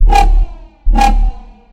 a kind of wobble
fx, smooth, animal, big, wobble, fat, agressivity, mobil, phazer, sub, bass, attack, mouvement, dog, phasing